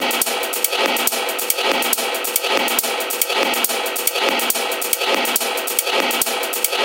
Space Tunnel 6
beat, dance, electronica, loop, processed